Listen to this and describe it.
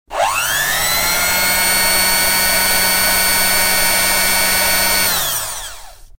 BEAT06MT
A sample of my Sunbeam Beatermix Pro 320 Watt electric beater at high speed setting #1. Recorded on 2 tracks in "The Closet" using a Rode NT1A and a Rode NT3 mic, mixed to stereo and processed through a multi band limiter.